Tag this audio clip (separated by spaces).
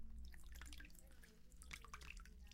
water spill liquid